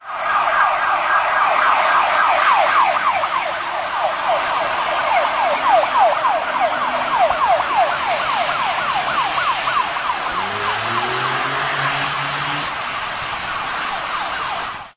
firebrigade bridge 300510
30.05.2010: about 22.00. The fire brigade signal recorded on the Boleslaw Chrobry bridge. This evening after quite serious downpour a lot of basements has been flooded. It is caused by overfilled sewage system in the center of Poznan
(we are having the main flood wave at this moment on the river Warta in Poznan). The city is full of ambulances, fire brigades, water emergency services signals. As well as helicopters.